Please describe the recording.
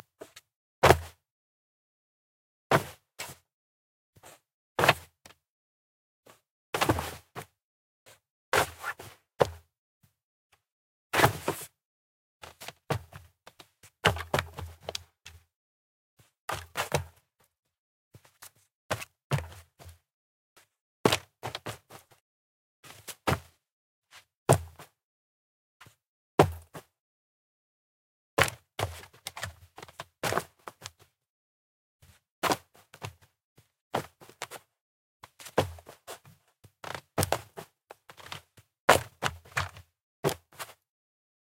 soft impact
sound made by dropping a hoody knotted and tightened into a ball being dropped on a couch, carpet floor and a pillow
dispose, drop, fabric, foley, garbage, ground, hit, impact, pillow, rubbish, soft, textile, thud